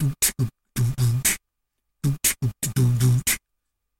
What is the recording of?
Beatbox 01 Loop 017a DaBoom@120bpm
Beatboxing recorded with a cheap webmic in Ableton Live and edited with Audacity.
The webmic was so noisy and was picking up he sounds from the laptop fan that I decided to use a noise gate.
This is a cheesy beat at 120bpm with a big boom kick.
This variation is intended as a fill / transition. It has a stutter on the kick.
120-bpm, bass, bassdrum, beatbox, boom, boomy, Dare-19, glitch, kick, loop, noise-gate, repeat, rhythm, stutter